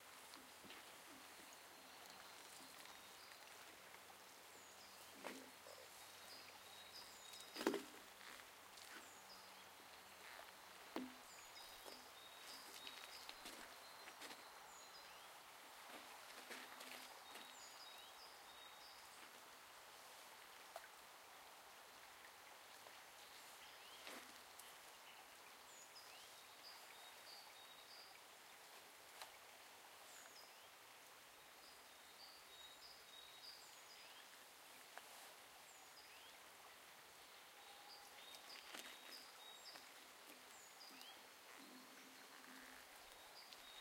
Steps in the forest
Recorded in Mátrafüred (Hungary) forest with a Zoom H1.
nature, naturesound, forest, steps, sound